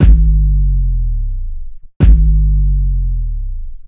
FATSUBKICK 198046EDITED
bass, club, deep, dnb, drop, drum, dubstep, effect, fall, fat, kick, low, sub, subby, trance, wobble